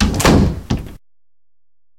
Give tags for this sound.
shut door-closing door close closing

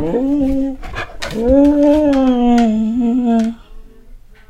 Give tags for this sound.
Funny-Dog Talking-Dog

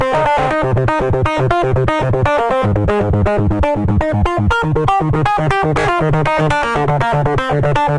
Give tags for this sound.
rythm bass resonant nord dirty melody bleep idm ambient electro glitch tonal backdrop soundscape background blip

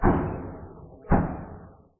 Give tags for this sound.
explosion indirect M224 military mortar report war weapon

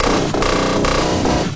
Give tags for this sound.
abstract digital effect electric electronic freaky future fx glitch lo-fi loop machine noise sci-fi sfx sound sound-design sounddesign soundeffect strange weird

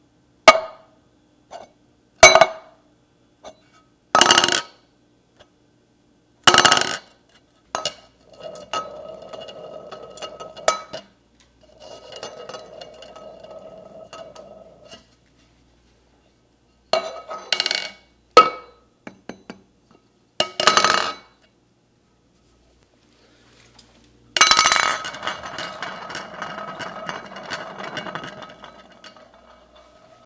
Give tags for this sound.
can drop metal roll tin

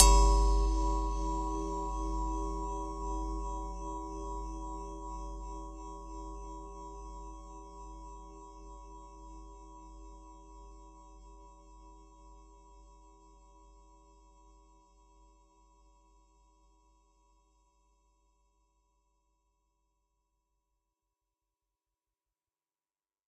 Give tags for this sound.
Bell Ring Ringing

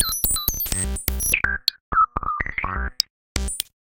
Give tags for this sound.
Abstract Loops Percussion

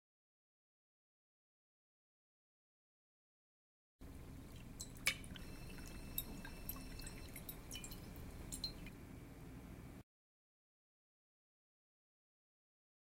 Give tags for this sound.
bar Bacardi alcohol

